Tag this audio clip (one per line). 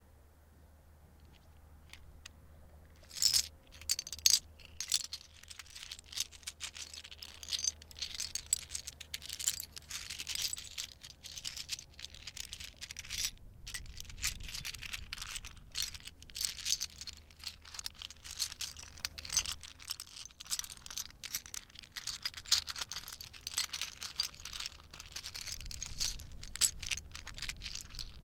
OWI
Shells